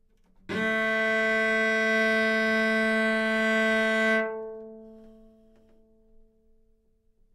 Cello - A3 - other
Part of the Good-sounds dataset of monophonic instrumental sounds.
instrument::cello
note::A
octave::3
midi note::45
good-sounds-id::443
dynamic_level::f
Recorded for experimental purposes
neumann-U87, good-sounds, single-note, multisample, A3, cello